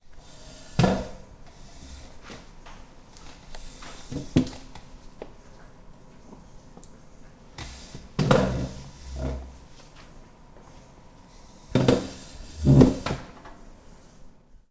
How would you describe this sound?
sound produced by the friction of the chair to the floor, this sound represent the action of when a person sits in a chair.This sound was recorded in silence environment and close to the source.
sit, campus-upf, chair